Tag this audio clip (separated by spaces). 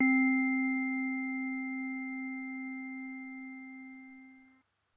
sample HZ-600 synth Casio 80s preset